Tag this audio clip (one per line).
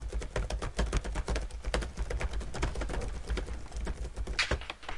keyboard
computer
typing